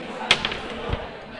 Table football starter ball
The ball falling on the surface of the table football.
campus-upf, starting, ball, futbolin, UPF-CS12, bar